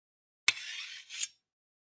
Sliding Metal 06
shield, rod, metal, shiny, blacksmith, iron, clang, metallic, steel, slide